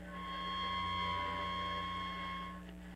bowed squeel
Recorded on a Peavy practice amp plugged into my PC. Used a violin bow across the strings on my Squire Strat. Nice squeely resonance caught while recording.
experimental, note, real, electric, bowed, string, guitar